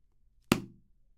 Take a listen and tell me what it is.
Sonido de el golpe a una pelota
Sound of hitting a ball
ball, choque, collide, Golpear, hit, impacto, shoot